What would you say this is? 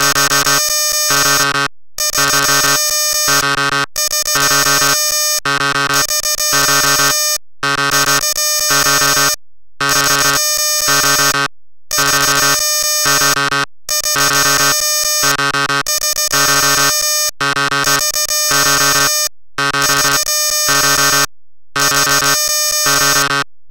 This sound is created by combination of Alarm 08 and Alarm 09 (loop)